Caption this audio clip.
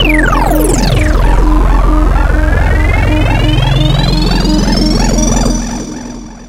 SemiQ leads 18.
This sound belongs to a mini pack sounds could be used for rave or nuerofunk genres
effect, sfx, sound, sound-design, sound-effect, soundeffect, soundscape